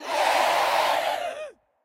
cell screams 9
Short processed samples of screams
human, processed, fx